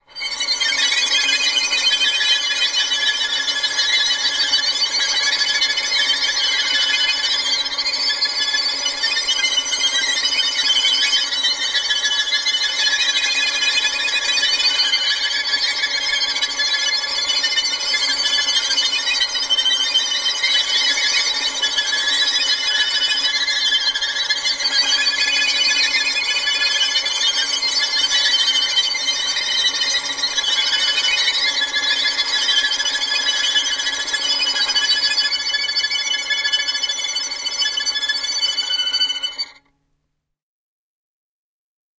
Horror, Violin Tremolo Cluster, A
Several raw recordings of me playing a dead ca- ... uh, violin. Very high pitched on the top E string, and in tremolo with the technique of 'sul ponticello' to create the shrieking timbre. I combined all of these recordings together in Audacity for this terrific sound.
An example of how you might credit is by putting this in the description/credits:
And for more awesome sounds, do please check out my sound libraries.
The sound was recorded using a "H1 Zoom V2 recorder" on 12th December 2016.
string, violins, violin, cluster, horror, scary, ponticello, shriek, strings